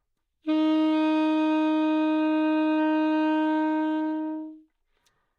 Part of the Good-sounds dataset of monophonic instrumental sounds.
instrument::sax_alto
note::D#
octave::4
midi note::51
good-sounds-id::4688